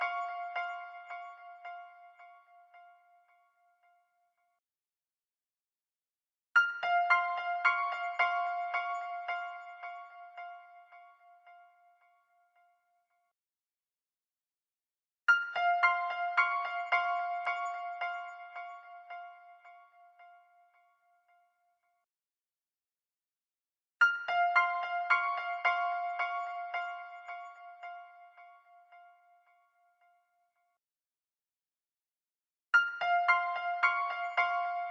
Trap piano chords, 110 BPM
Chords, Piano, Trap